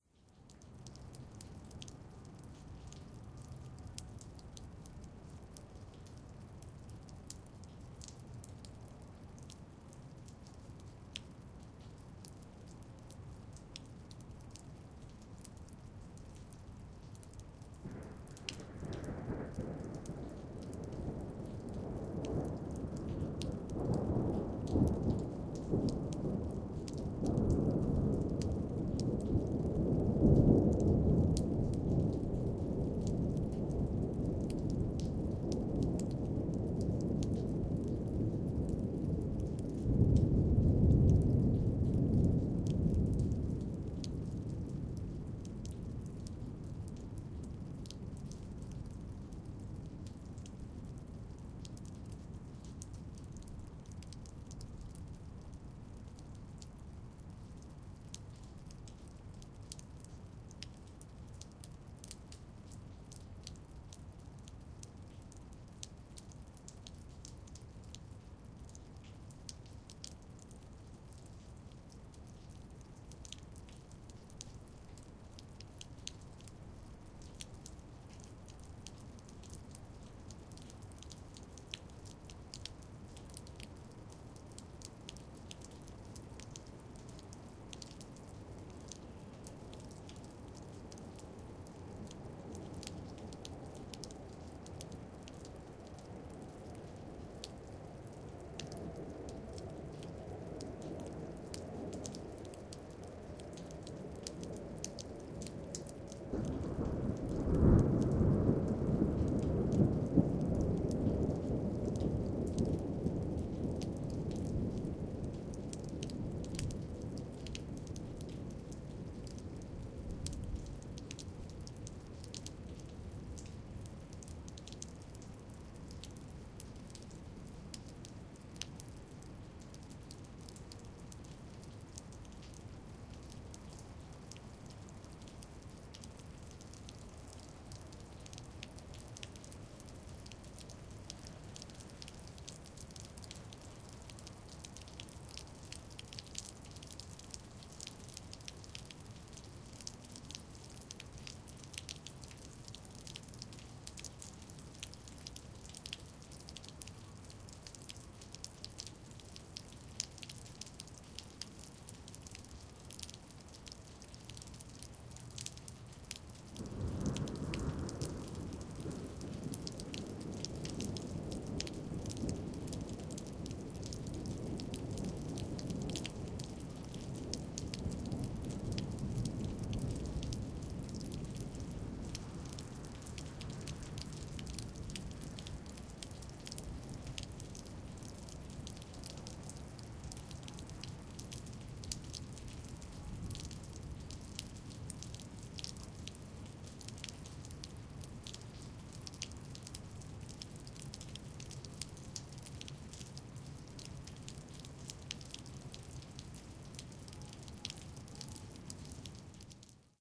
Thunder at Deer Grove Forest Preserve (03-08-2009) REAR
REAR L+R CHANNELS. A passing thunderstorm and dripping rain recorded on March 8th, 2009 at Deer Grove Forest Preserve near Palatine, Illinois. The recording is slightly edited to prevent it from being too long. The recorder was placed under a picnic area roof, so the water is dripping from that. I think you can almost hear the storm passing from approximately right to left. Recorded using a (slightly wet) Zoom H2 in 4 channel surround mode. Please see also the FRONT L+R channels of this 4 channel recording.
rain, dripping, birds, surround, outdoors, plane, thunder, field-recording, traffic